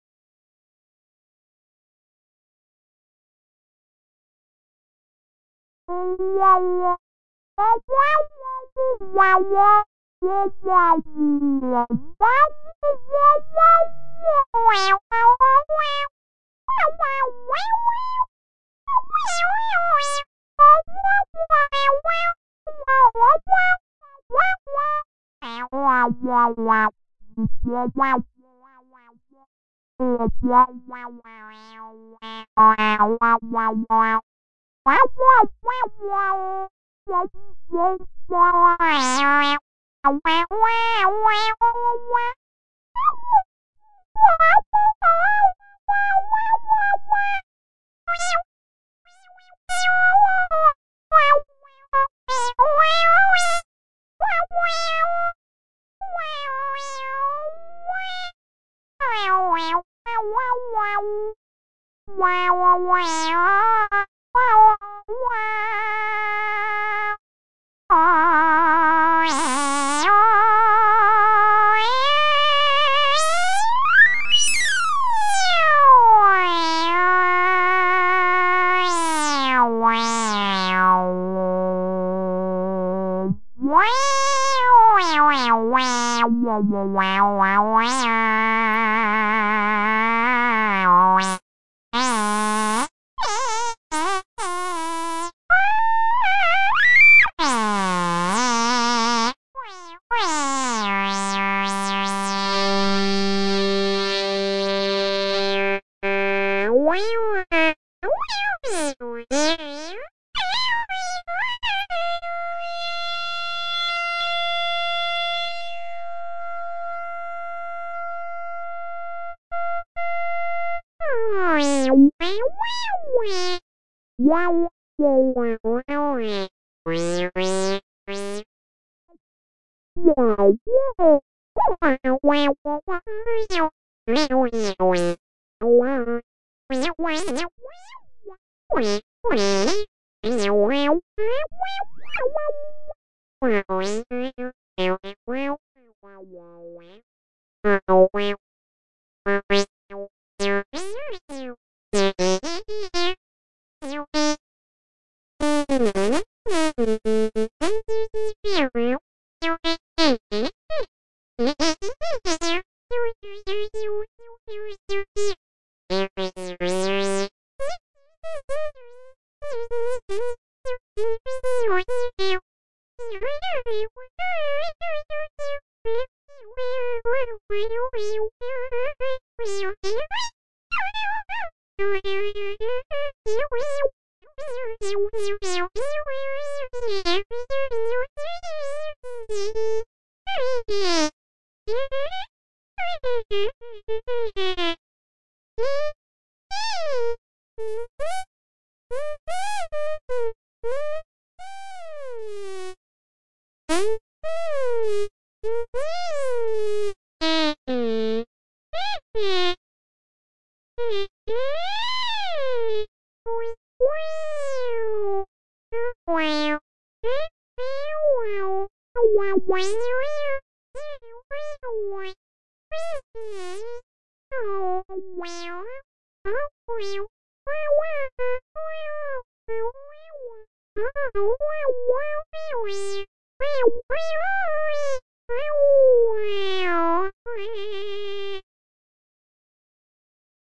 re-sampled loop point stretching